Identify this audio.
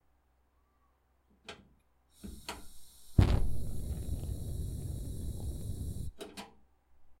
Close up turning on gas stove top burner then turning it off